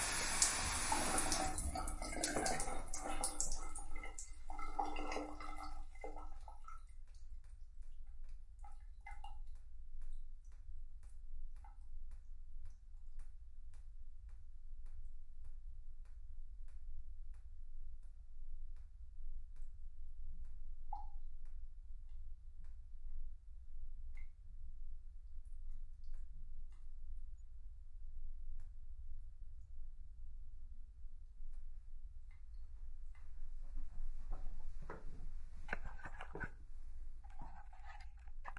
Shower Drain 2
Field recording of water going down my shower drain.
Field-recording, shower-drain, water, liquid